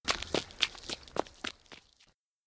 Sound effect for a person running away (2 of 2). A similar sound effect and a full recording of many types of running and walking are also available.
Recorded with a Samson Q7 microphone through a Phonic AM85 analogue mixer.